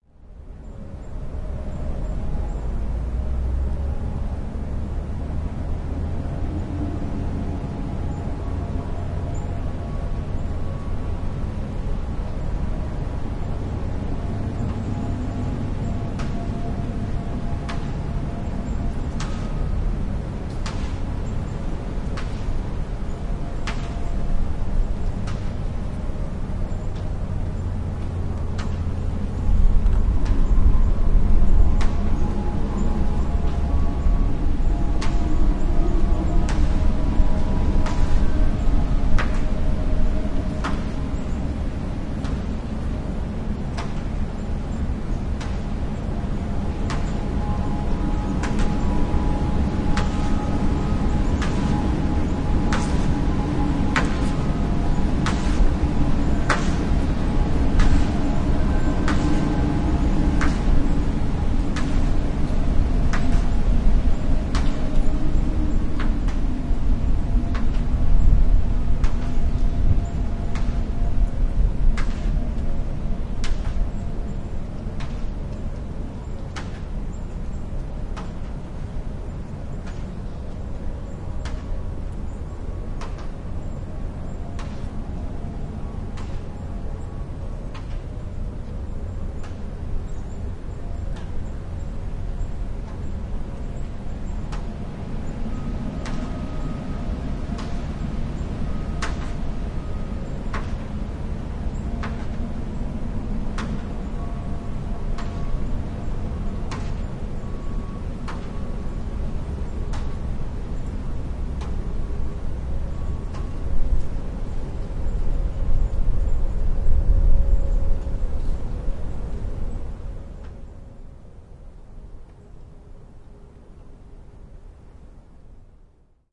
ambient-tower
This is a recording of wind whistling in a large radio tower. The various metal parts of the tower produce soft, eerie tones.